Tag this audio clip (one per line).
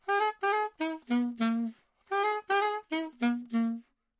loop
melody
sax
saxophone
soprano
soprano-sax